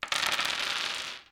A dice roll from a series of dice rolls of several plastic RPG dice on a hard wooden table. This one features a whole hand full of dice. Recorded with a Sony PCM M-10. I used it for a mobile app.
recording, effect, dice, roll